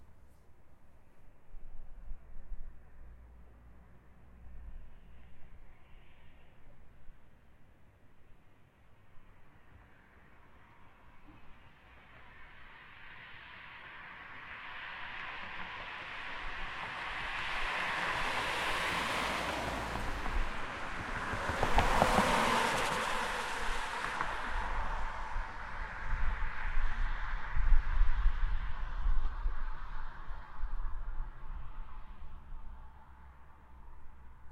Cars passing in the night
Cars, Night, Passing